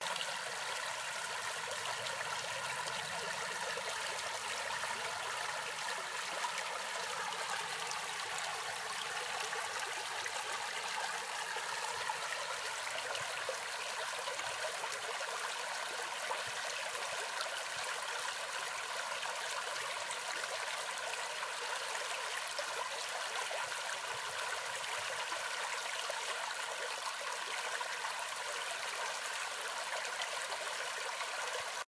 Watercourse. Recorded in Krasnodarsky kray, South of Russia. August 2016.
Recorded by iPhone5S.
New not free version of similar sound, recorded in 2021. For new recording used stereo pair Rode M5 mic and Zoom H5 recorder:
Krasnodar Krai is located in the southwestern part of the North Caucasus and borders with Rostov Oblast in the northeast, Stavropol Krai and Karachay-Cherkessia in the east, and with the Abkhazia region (internationally recognized as part of Georgia) in the south. The Republic of Adygea is completely encircled by the krai territory. The krai's Taman Peninsula is situated between the Sea of Azov in the north and the Black Sea in the south. In the west, the Kerch Strait separates the krai from the contested Crimean Peninsula, internationally recognised as part of Ukraine but under de facto Russian control. At its widest extent, the krai stretches for 327 kilometers (203 mi) from north to south and for 360 kilometers (220 mi) from east to west.
ambient, babbling, brook, creek, field-recording, flow, flowing, forest, gurgle, liquid, nature, relaxing, river, spring, stream, summer, trickle, water, Watercourse